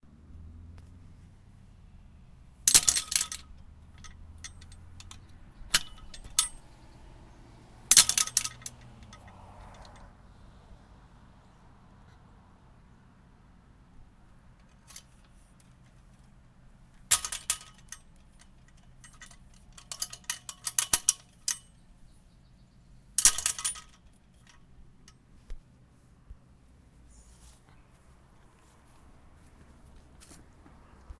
Sounds of a metal outdoor gate latch opening and closing